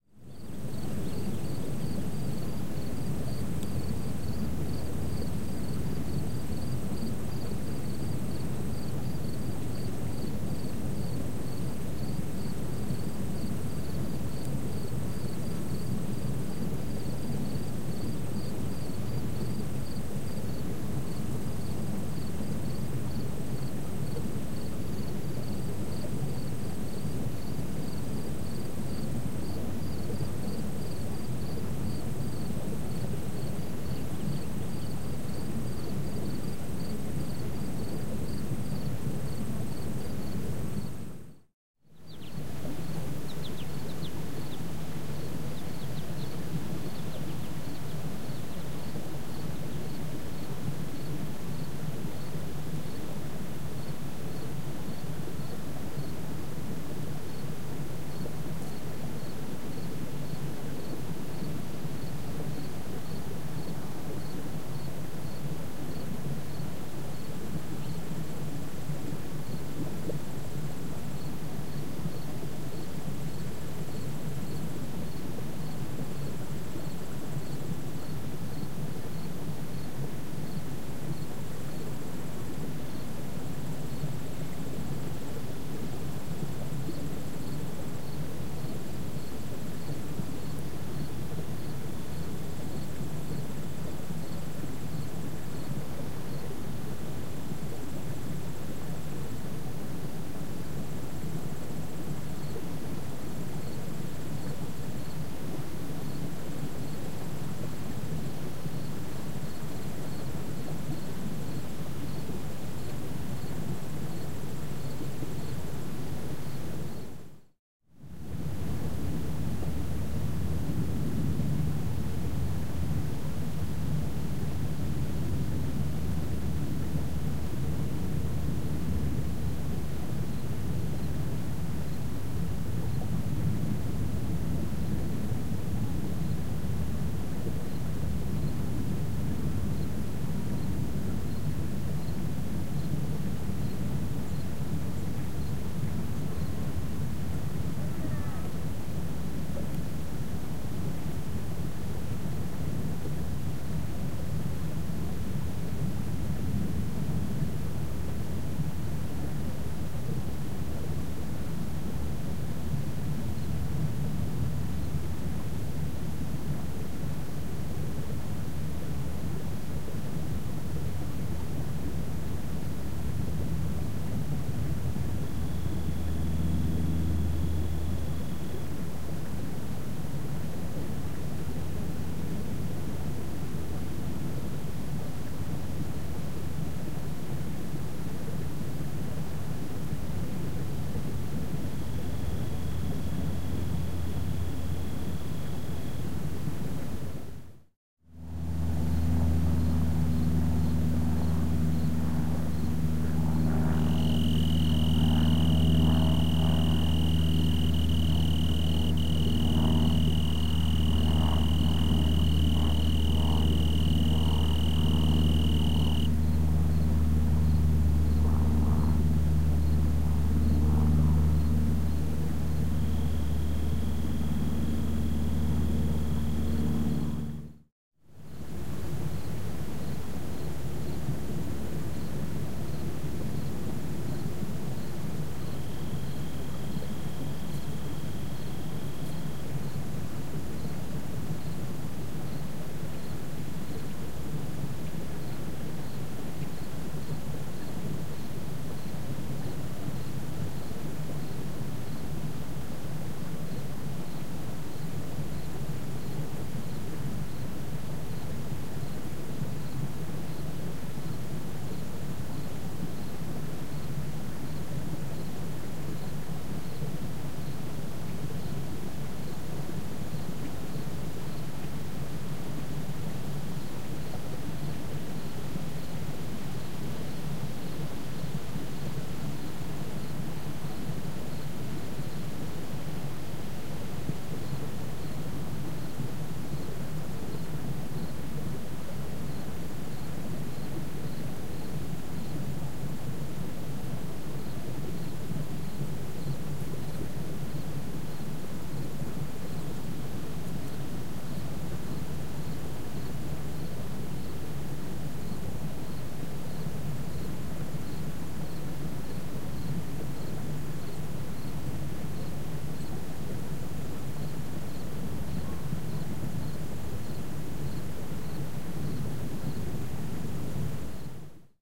This is a field recording of a weedy area next to an irrigation ditch, west of Scottsbluff, Nebraska, during the total solar eclipse of August 21, 2017. It is divided into 5 snippets recorded before, during, and after totality. There isn't much difference, or what difference is there is more explained by variations in individual noise-makers (birds flitted about the area, sometimes being close enough to be audible, other times not, etc.).
Segment 1 (41 sec) starts about 55 minutes before totality. This is at about 10:50 AM.
Segment 2 (76 sec) starts about 9 minutes before totality; getting fairly dark.
Segment 3 (80 sec) starts just before the onset of totality and continues into it -- around 11:45 or so, totally dark (or at least, as dark as it gets during a total solar eclipse). You can hear some people's exclaimations in the background -- they were actually very far away.